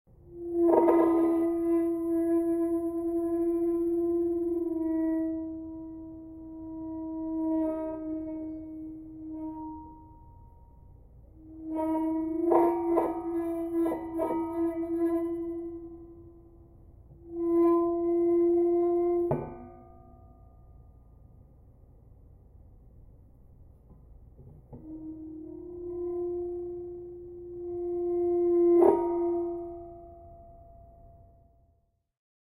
Spinning an antique propeller while exploring an architectural salvage shop. It makes a beautiful droning sound.